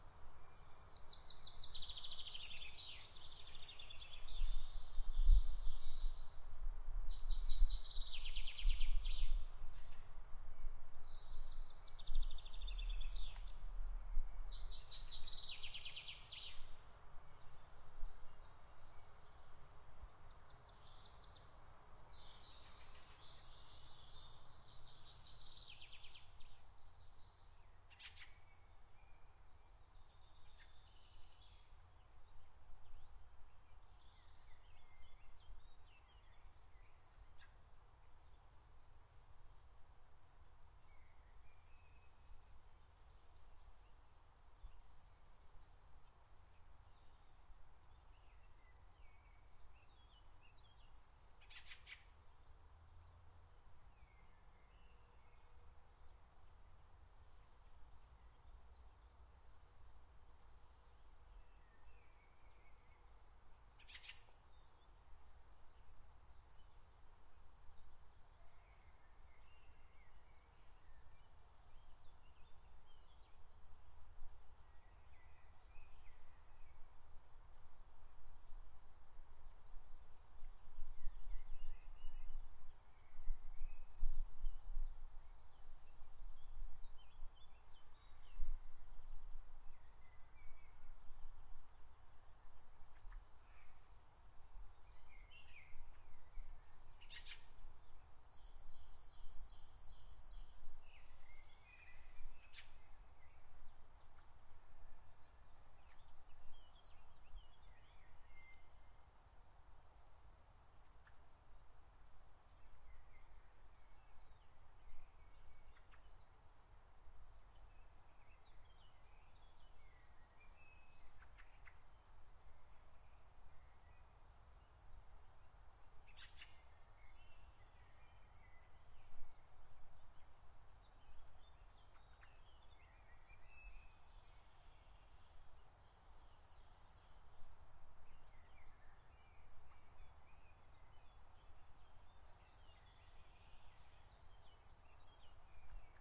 Spring Birds in Finnish forest
Spring forest in Finland